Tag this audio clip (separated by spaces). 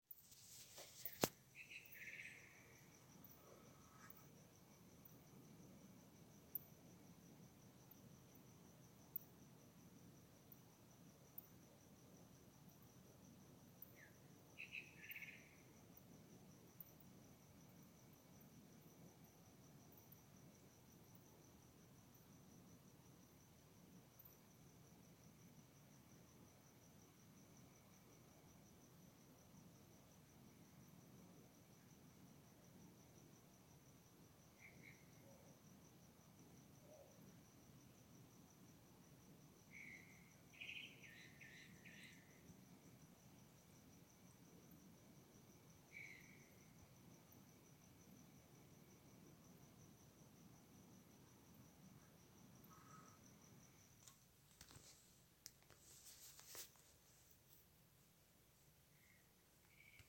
ambiance ambience ambient ambiente atmosphere city cuarentena cuarentine field-recording nature night noche silencio silent soundscape